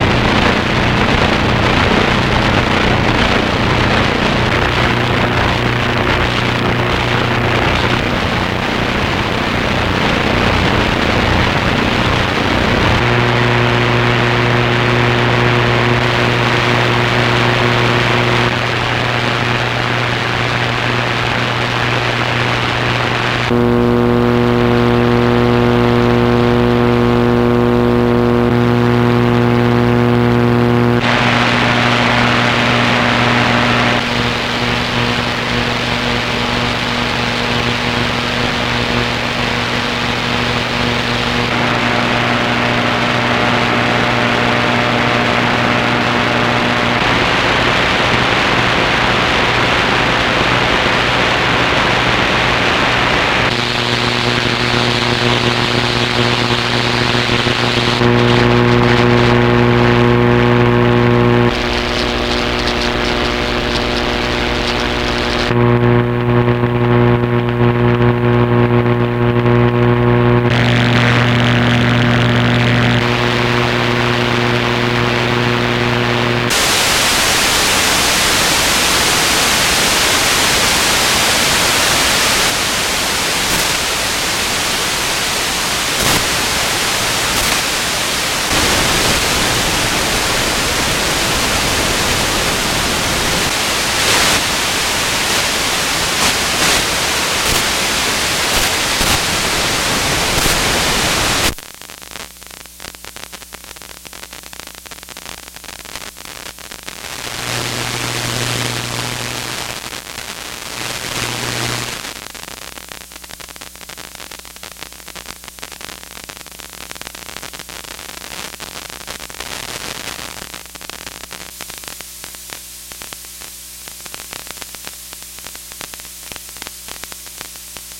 AM and FM static splices REEL
Looking for a new noise source? Here are 25 Splices of noise and interference sounds picked up on the AM and FM radio bands, normalized and formatted for use with the Morphagene! Save the Reel onto an SD card, load it onto your Morphagene and go exploring!
amfm, noise, interference, static